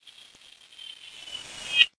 Old TV switching off, with an eerie 50's horror kind of tone to it.
Actually a recording of a hand-cranked generator edited and played backwards.